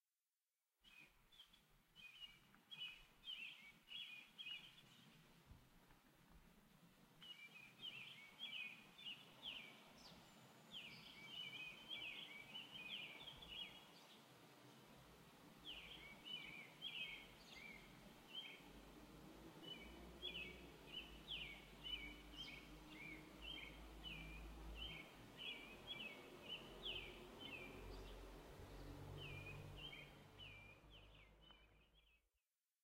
Suburban Birds
Three birds singing in a suburban park with soft, distant traffic noise.
Recorded with an H4n recorder and Shure SM63LB omnidirectional mic.
ambiance, birds, field-recording, ambient, suburban, city, Park